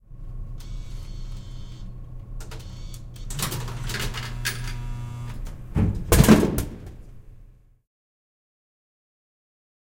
Coins, followed by the delivery of a soda can by a soda machine.
machine, soda, vending